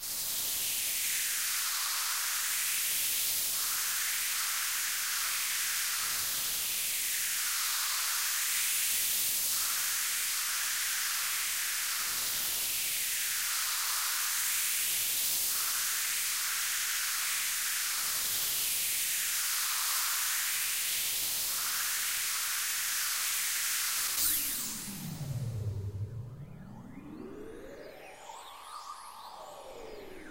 Steam sound with phasing towards the end.
factory, industrial, machine, machinery, noise, steam